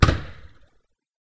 This is the denoised version of one of my cleanest firework launch recordings. Original recording was made 2 years ago at New Years Eve by MP3 player.